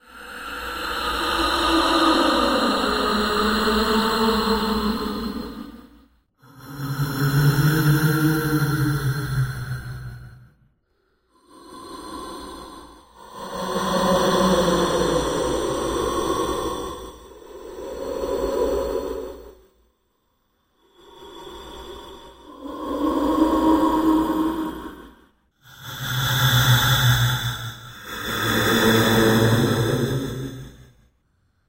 Scary ghost/spirit breathing
A creepy/scary breathing sound from a ghost or spirit of some sort.
This sound was just short breaths and moans into my microphone paulstretched and edited in to this frightening sound.
Also, please tell me where you've used this sound in the comments, I'd really like to see where this sound has been used! Thanks!